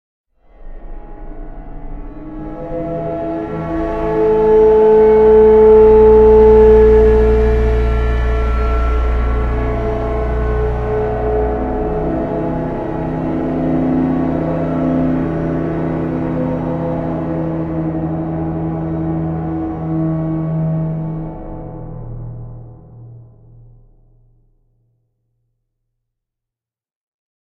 Monsters approach
How else are going to warn your characters about their imminent death by monster?